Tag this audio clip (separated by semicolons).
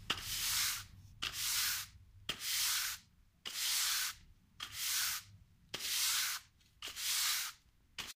broom; sweeping; OWI